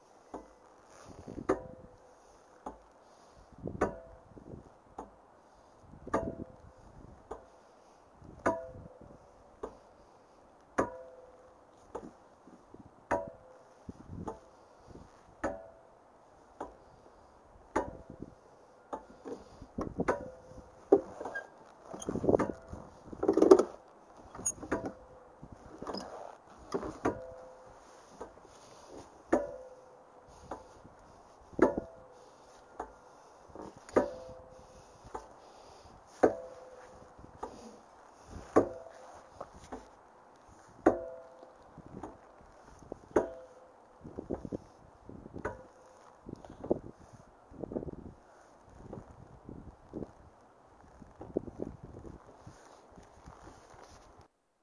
Swing1 TireRhythm AlumSpringsPark Nov2011
A recording of the chains of a tire swing clicking together as the swing goes around and back and forth.
clicking, click, swing, field-recording, metal-chains, metallic, swing-set